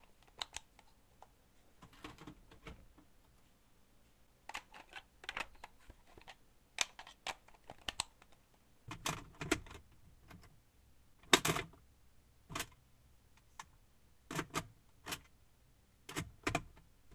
Putting & pulling cartridges from Famicom
Cartridge, Famicom, Nintendo